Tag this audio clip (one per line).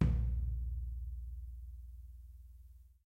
tom
percussion